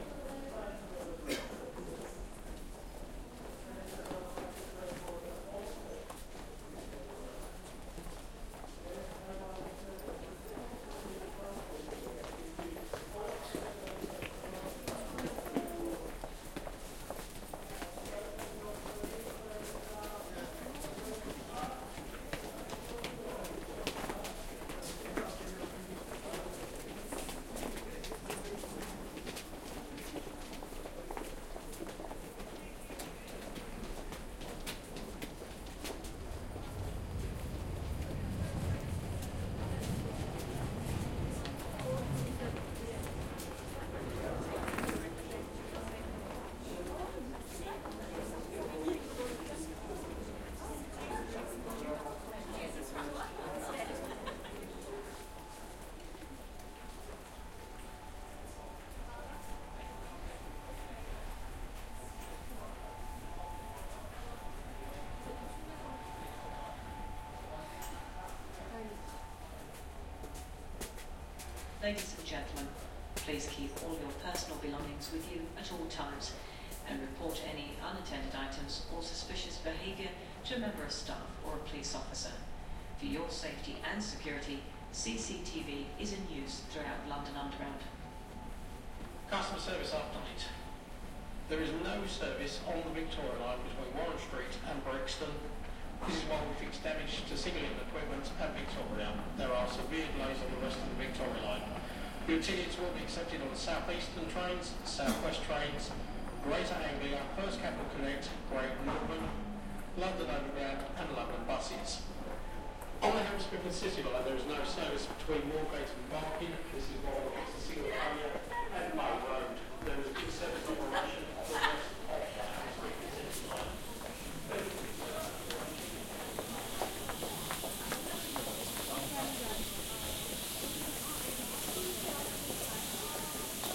Waiting in the London underground station.
Recorded with Zoom H4N.